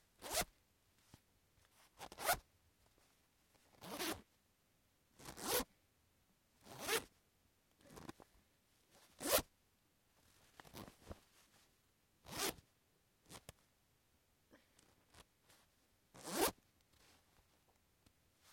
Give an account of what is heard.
Zip Sounds
Zipping a bag open and close